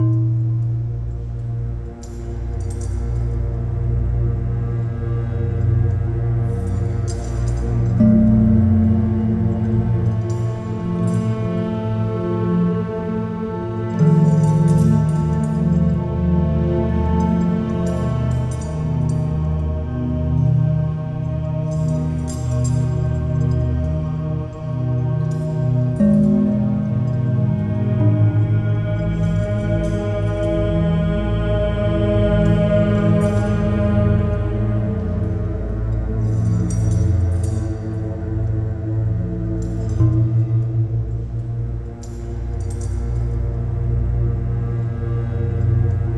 Cave temple - atmo orchestral drone thriller
thrill, ghost, drama, terror, sinister, creepy, phantom, suspense, Gothic, thriller, temple, drone, Cave, orchestral, haunted, background-sound, atmo